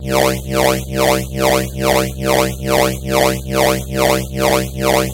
Dubstep Wobble 11 Apr 2 1
For use at your leisure. I make most of them at 140 bpm so hopefully one day they make their way into dubstep.
Chop/splice/dice/herbs and spice them, best served piping hot, enjoy.
Fondest regards,
140, bass, bounce, bpm, club, crunchy, dance, deep, drop, dub, dub-step, dubstep, effect, electro, electronic, end, glitch-hop, high, house, loop, low, panning, rave, sub, techno, trance, wobble